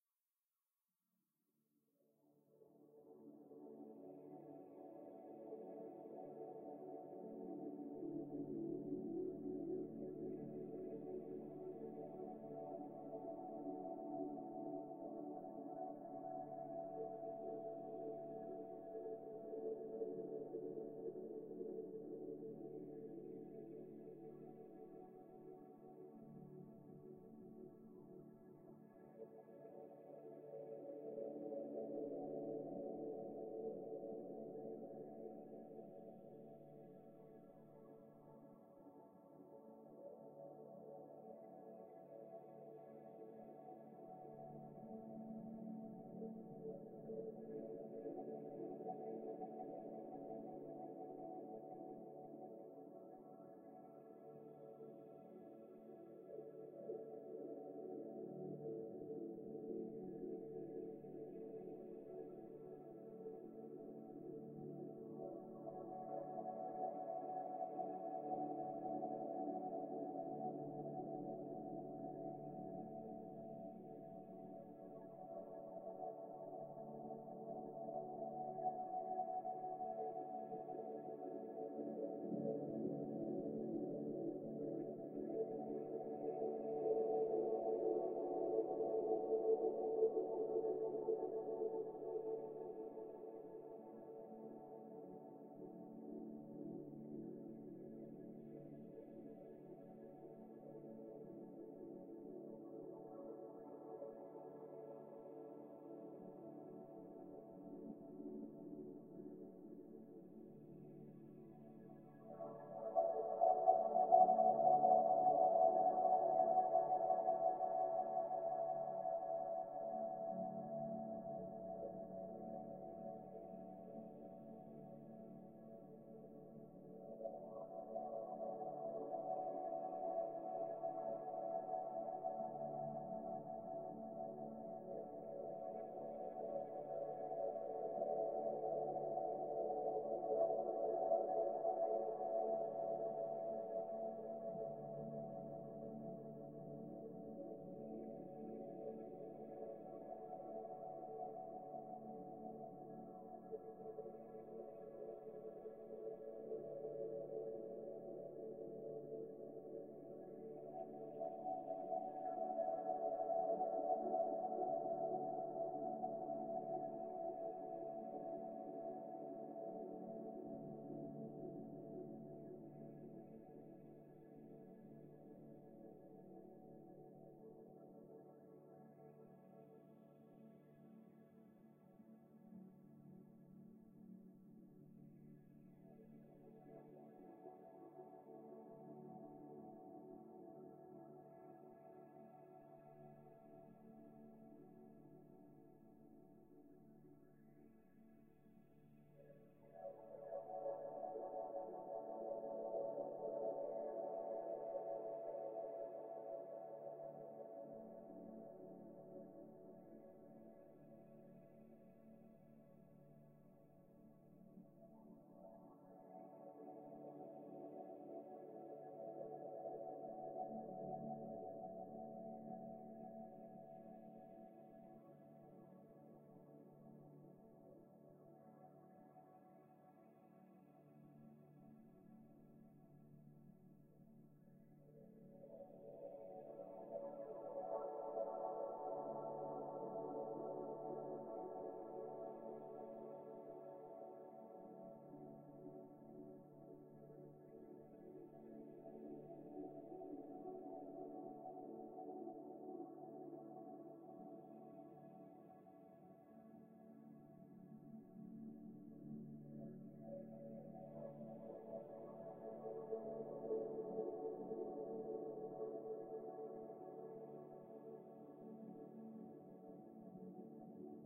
Dmaj-water pad2
A pad I created for my music. Used in "Elements" LP, track 2 "Water basin". In Dmaj.
ambient, water, pad